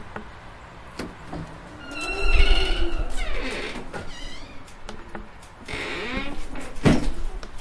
creaking of door from outside
The sound of wind blows as the sound of one enters and closes. Another door opens in background. Open space, outside going in, and three people. outside building, under overhang.
creaking, closing, door, open, squeaky, close, metal, glass